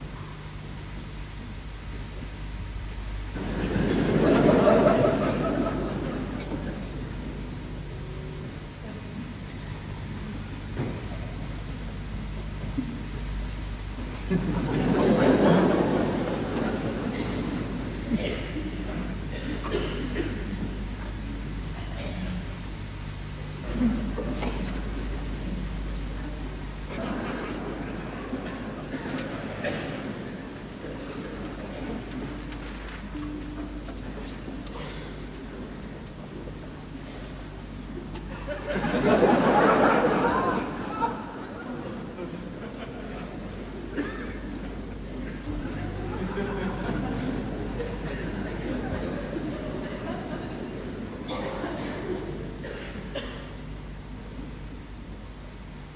concert hall lauphing and cauphing
Ambience of a big concert hall. People are lauphing about the actor. Between people are cauphing.
(Very old recording from 1982 with bright swoosching)
cauphing, concert-hall, lauphing